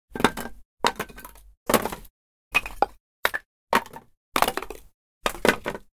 Throwing small wood pieces
Me throwing small wooden pieces. Recorded with Tascam DR-05
plank, fall, wooden, lath, hit, falling, throwing, hitting, firewood, slat, wood, throw, slash, tree, stick, small